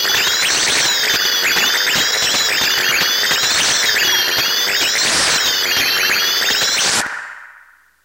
Freya a speak and math. Some hardware processing.